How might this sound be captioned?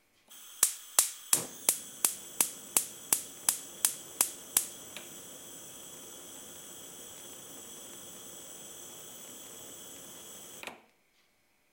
gas stove in kitchen